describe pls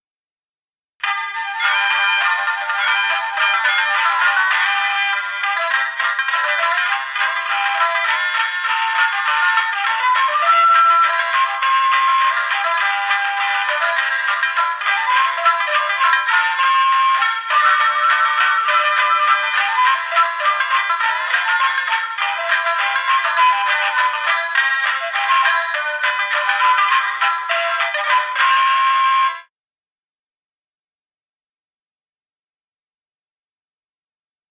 Here is the second song in this pack. This is one of my favorite renditions of this song. Follow me and check this pack often for new uploads. This sound was recorded from a different model musical horn, made by Wolo Manufacturing. This is an older recording, but I don't know exactly when this was recorded.
Melody, Music, Song